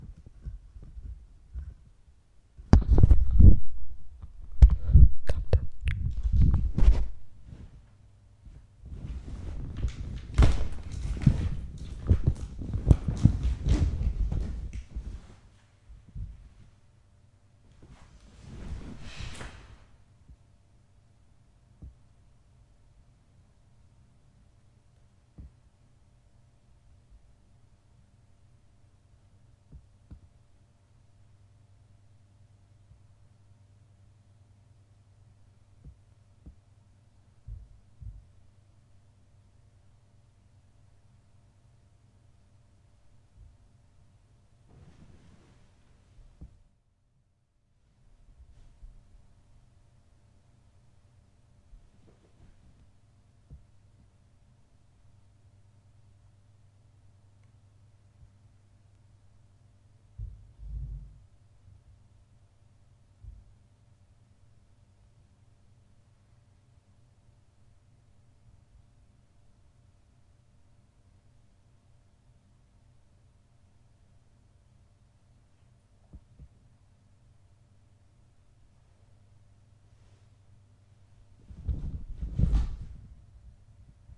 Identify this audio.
kitchen room tone
quick room tone recording, might have to cut out some moving bits